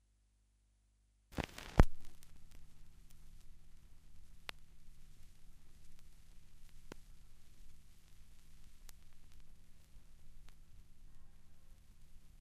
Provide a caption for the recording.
Record needle (stylus) lands on the record (LP). A few seconds of rumbles and clicks at the start of a record. Stereo.
click, deck, disc, lp